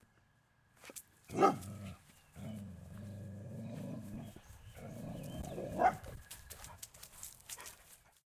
A dog running and barking and growling at something.